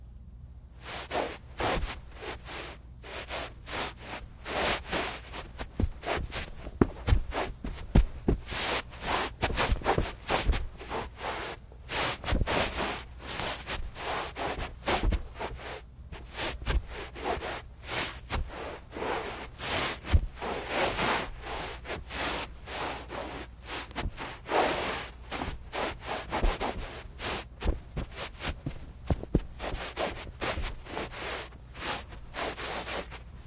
shuffling in tennis shoes on carpet
Two people working quietly on carpet while wearing tennis shoes.